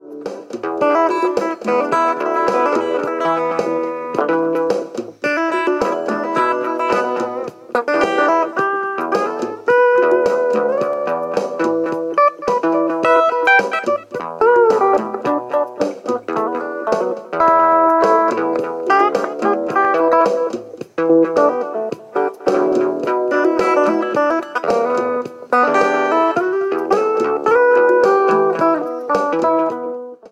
Same loop, lead guitar high point, Korg, BossDr3, electric guitar, to Smartphone
electric, bass, Korg, synth